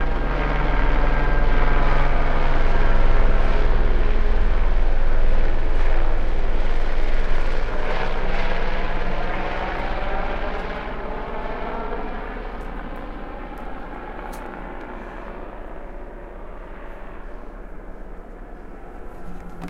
police helicopter circling right above
chopper, police, helicopter